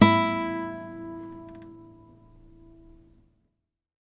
Nylon string guitar, plucked open string.